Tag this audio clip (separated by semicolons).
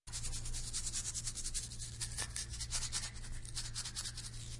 brushing; teeth